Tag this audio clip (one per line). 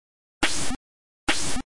glitch
procesed